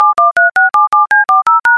push phone02
call, calling, Japan, Japanese, mobile, phone, push, ring, telephone